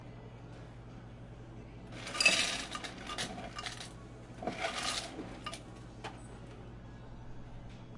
the sound of an ice machine in a university dining hall. recorded in a cafeteria with a SONY linear PCM recorder held a foot away from the dispenser.
dining-hall, stanford, water, machine, aip09